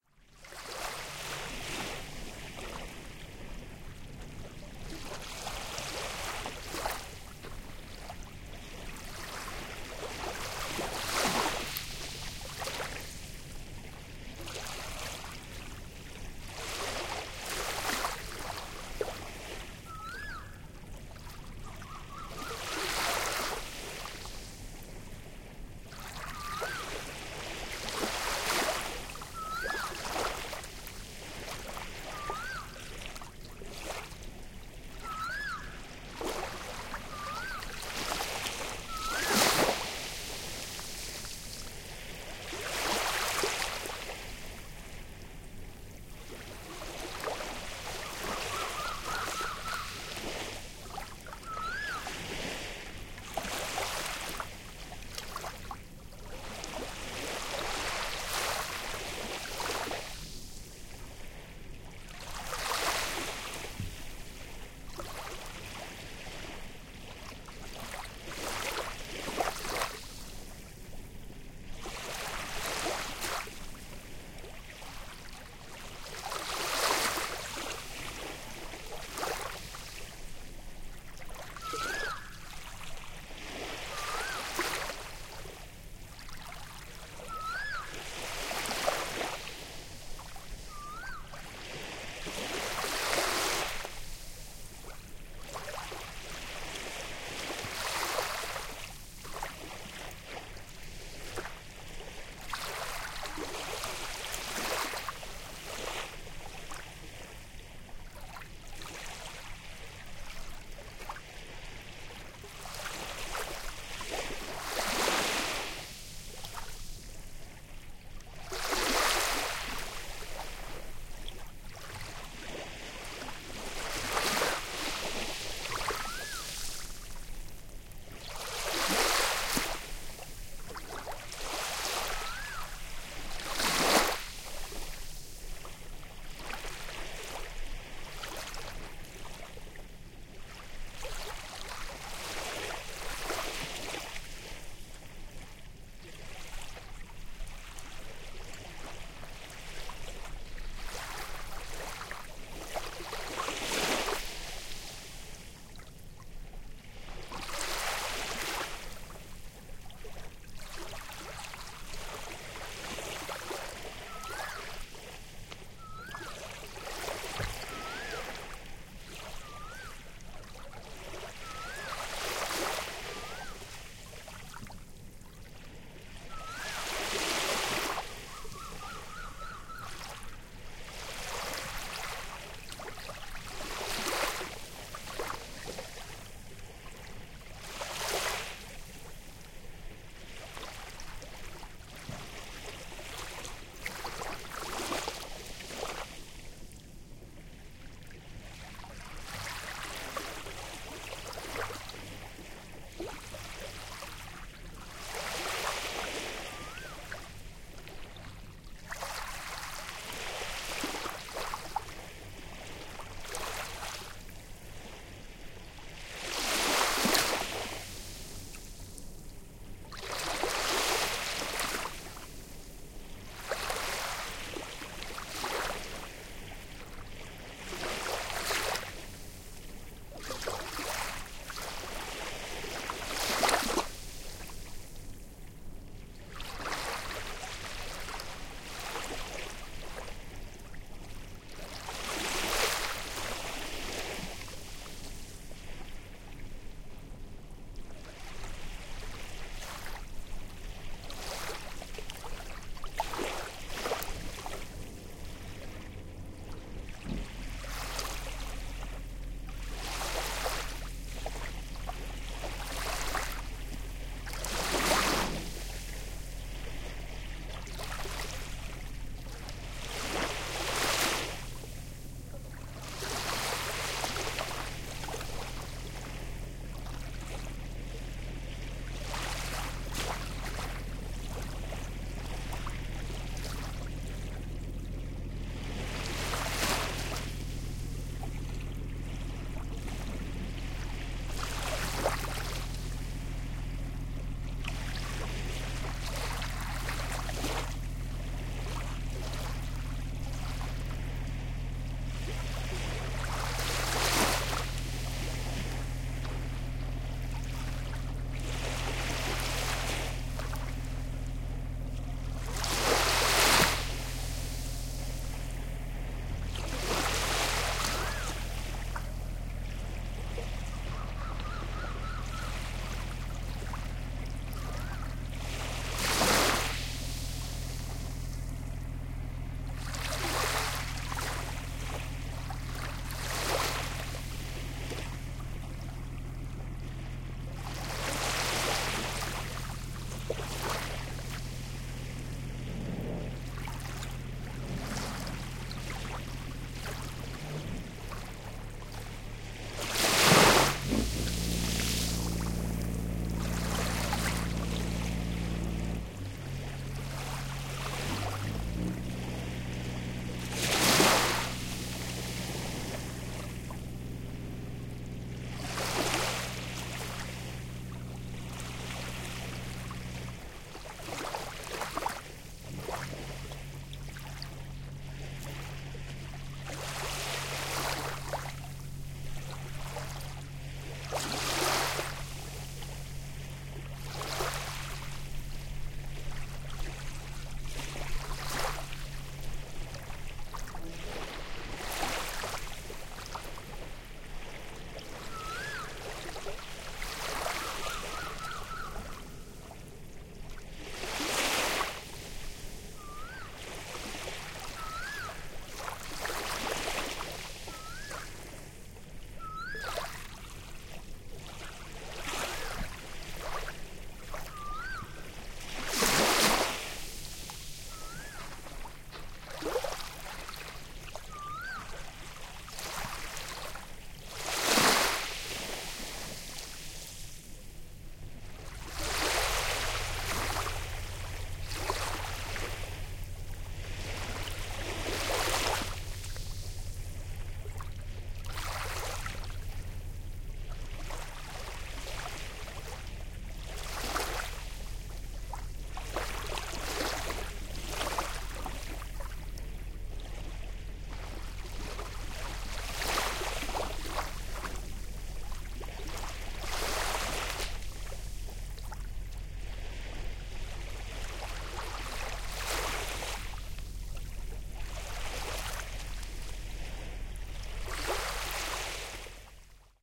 Tropical Island
Recorded on the beach of Athuruga, Maldives with boats chugging past and an Asian Koel occasionally calling in the background.
sea, shore, waves, gentle, asian, coast, maldives, nature, field-recording, coastal, ocean, tropical, surf, call, island, water, seaside, sand, beach, bird, wave, koel